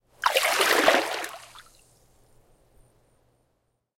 Raw audio of swirling water with my hands in a swimming pool. The recorder was placed about 15cm away from the swirls.
An example of how you might credit is by putting this in the description/credits:
The sound was recorded using a "H1 Zoom recorder" on 1st August 2017.